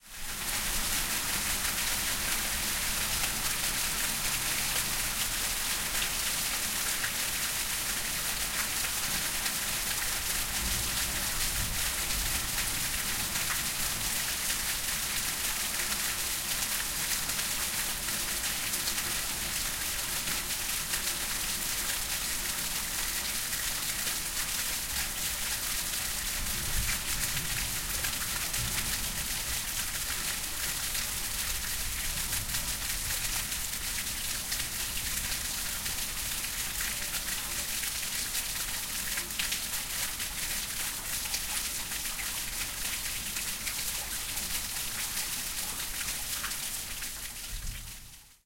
Rain coming down from roof
Recorded from a window of a farmhouse. You hear the heavy rain coming down in the garden, water dripping from the roof.
Recorded in Gasel, Switzerland.